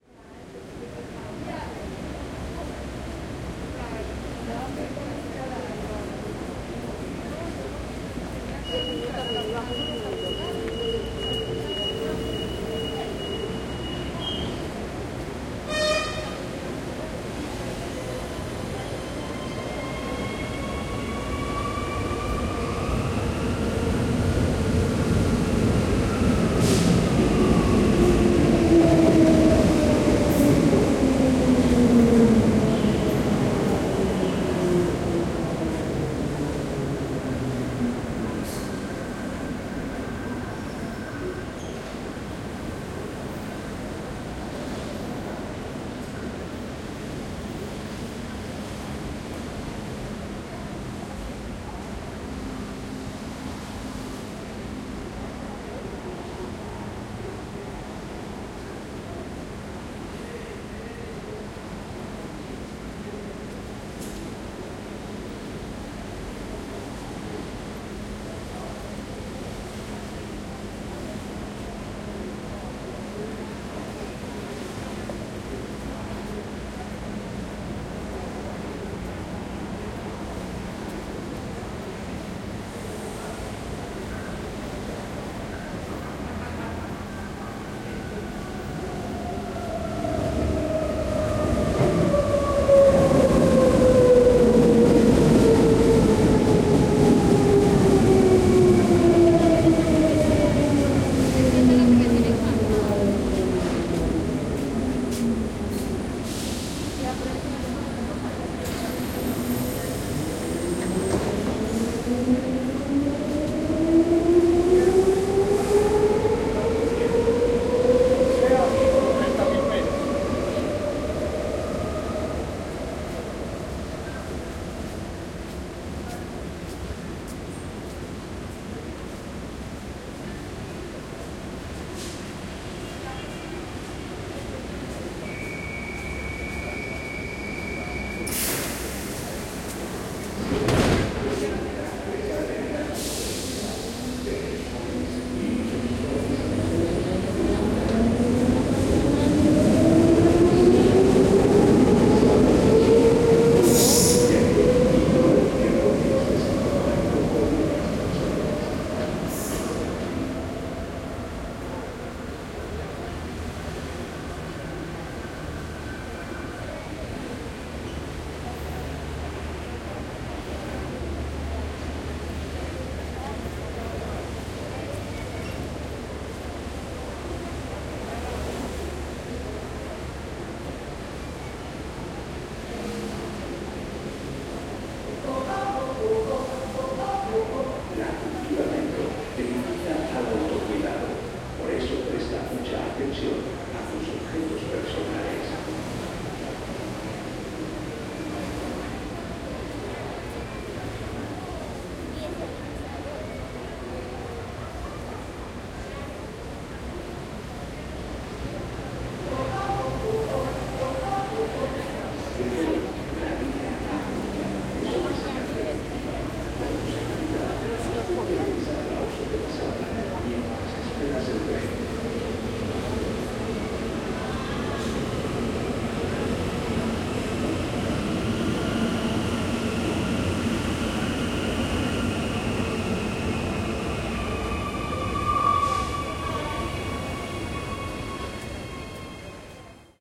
Medellin Metro Busy Frequent Walla FormatA
Ambience and walla from a Medellin's metro station with frequent trains passing by Ambisonics Format A. Recorded with Zoom H3-VR.